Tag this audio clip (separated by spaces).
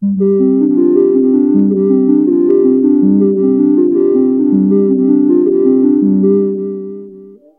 ambient backdrop rythm background glitch nord melody soundscape electro idm